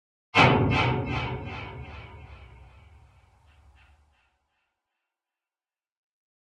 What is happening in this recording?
Horror Stinger 6
This stinger is best suited to horror contexts.
It could be used to support an anxiety inducing occurrence.
Low-frequency rumble and mid-frequency echoey metallic stab.
Designed sound effect.
Recording made with a contact microphone.
anxiety, danger, eerie, killer, metallic, scare, scary, stab, stinger, threat, unsettling